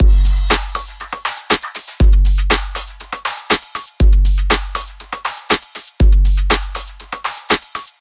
Created in Hydrogen and Korg Microsampler with samples from my personal and original library.Edit on Audacity.
beat, bpm, dance, drums, edm, fills, free, groove, hydrogen, kick, korg, library, loop, pack, pattern, sample